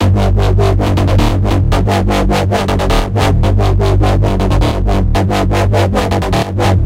Raw DUBSTEP bassline G 140 BPM
If you can, please, give us feedback. We care.
Get the the remaining 146 MB of Modern Basslines sample pack at our website.
Made using the amazing MPowerSynth + our very own FX chain [which is going to remain our little secret].
Thank you.
bass, processed, modern, club, electro, sound, electronic, G, massive, dance, design, dubstep, 140-bpm, producer, house, modulated, DJ